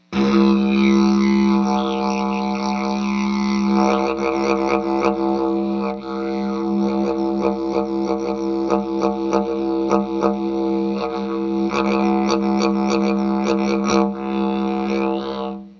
This is me on my didgeridoo.